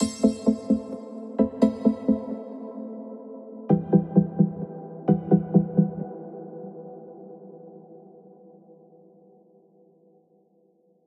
A collection of pads and atmospheres created with an H4N Zoom Recorder and Ableton Live